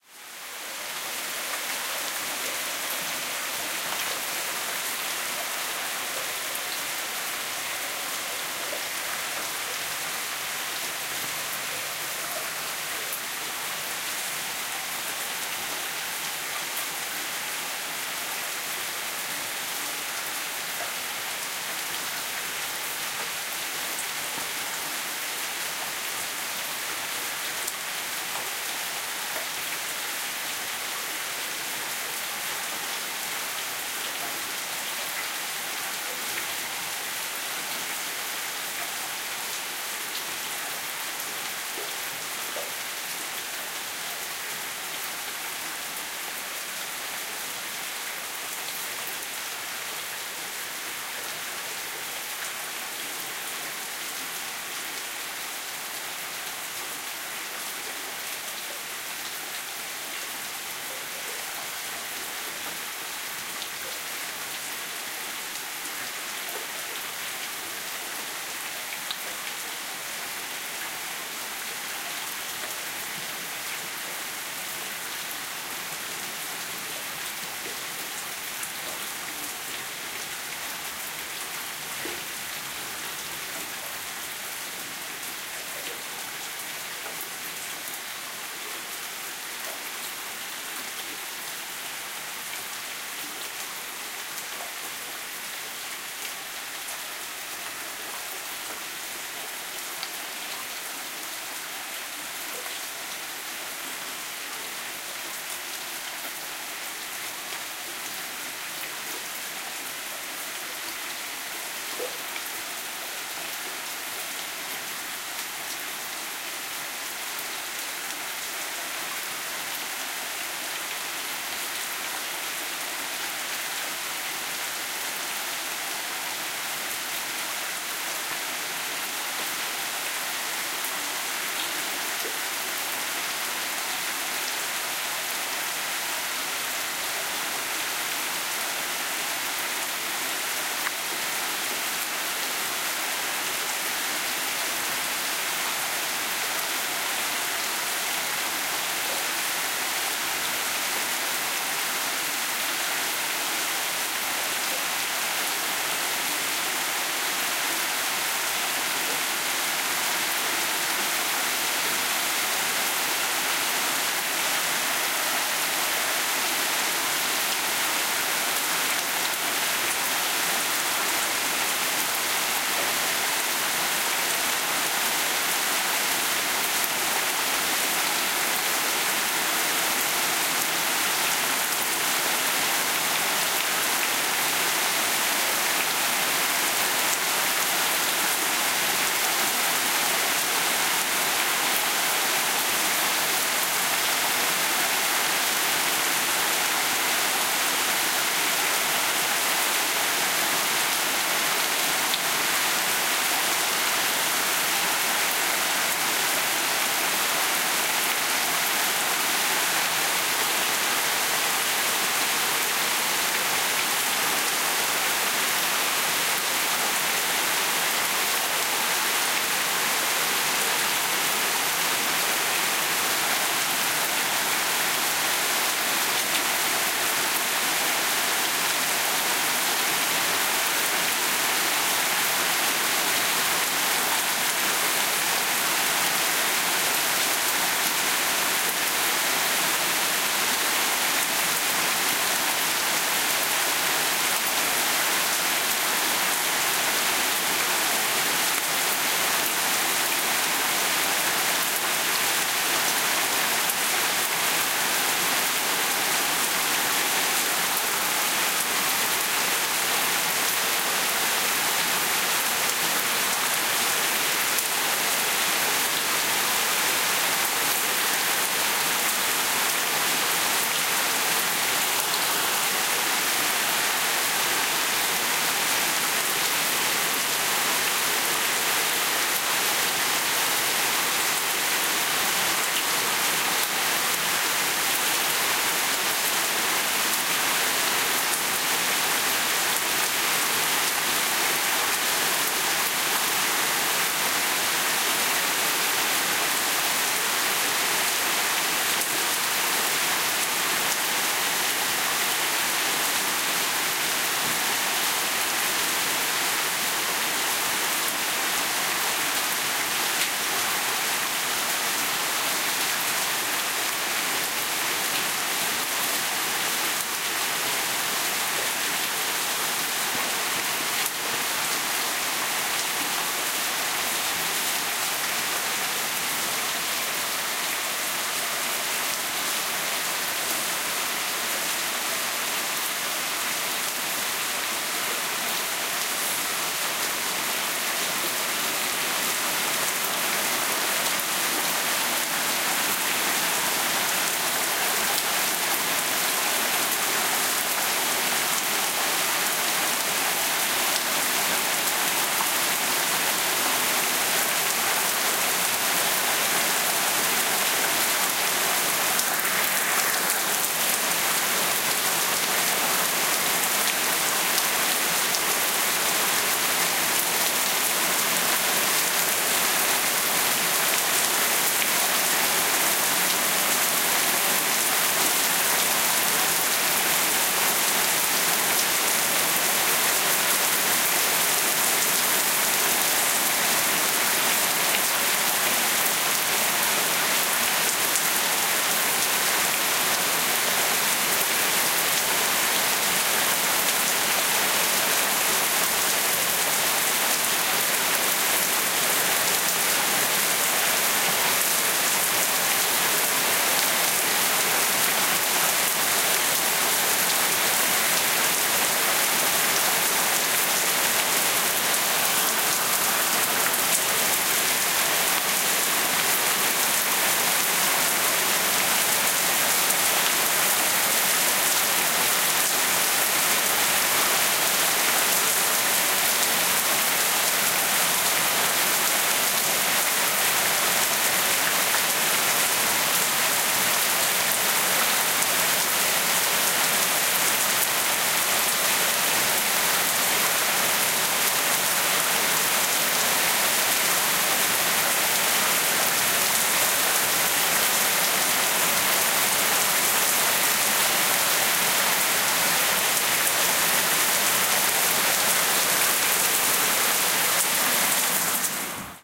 heavy-rain outside 2014
Heavy rain de- and increasing intensity and noise-level, midnight
midnight, nature, wind, drops, garden, rain, weather, heavy-rain, field-recording, water